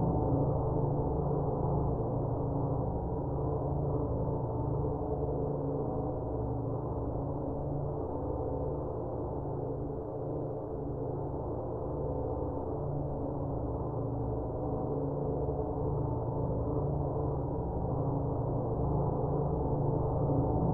Effects on a gong sample.